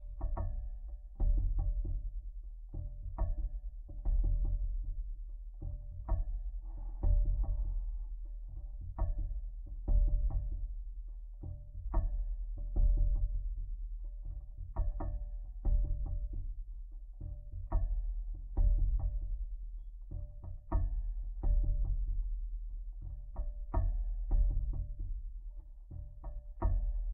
FX SaSc Low Metallic Rhytmic Periodic Movement Metal Impacts Hits Geofon
Low Metallic Rhytmic Periodic Movement Metal Impacts Hits Geofon
Low, Geofon, Periodic, Metal, Impacts, Movement, Hits, Metallic, Rhytmic